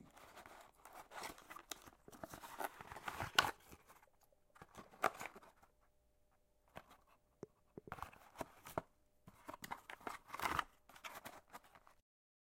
Storing an item in a Box
The sound comes from a tattoo gun being placed back into its box.
box, storage, machine